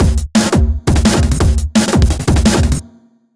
A drumsample witch I created with fruityloops. Bad sh**!